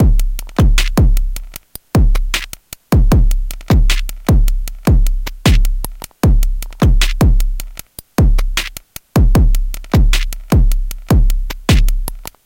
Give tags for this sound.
77bpm; beat; cheap; distortion; drum; drum-loop; drums; engineering; loop; machine; Monday; mxr; operator; percussion-loop; PO-12; pocket; rhythm; teenage

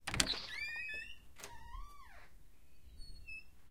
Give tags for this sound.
creak; creaking; creaky; door; door-open; hinge; indoors; inside; open; opening; opening-door; outdoors; outside; squeak; squeaking; squeaky

